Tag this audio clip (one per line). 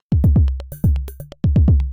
125-bpm
electro